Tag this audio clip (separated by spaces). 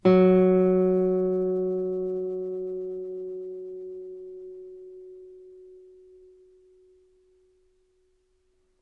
f guitar music note notes nylon string strings